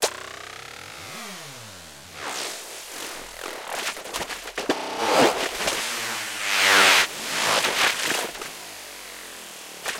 Abstract wooshes made from sound of stepping onto plastic bottle. Heavily processed in HourGlass.